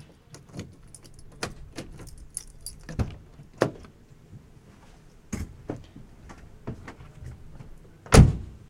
open and close car door
door, close, car, open